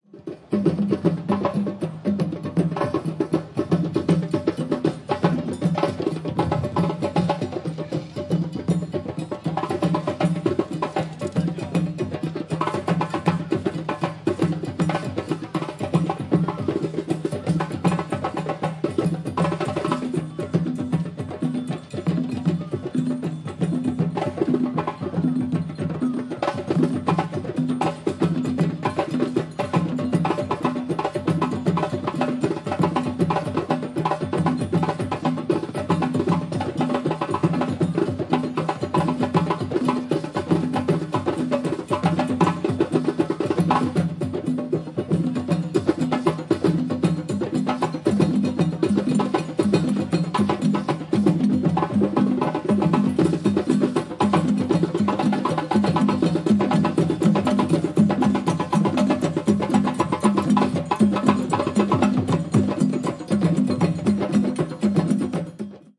Tokyo - Drum Circle in Yoyogi Park
An 11 piece drumming group performing on a Sunday morning in Yoyogi Park. Recorded in May 2008 using a Zoom H4. Unprocessed apart from a low frequency cut.
tokyo, percussion, yoyogi, japan, circle, drum, park, drums, conga, h4, zoom, field-recording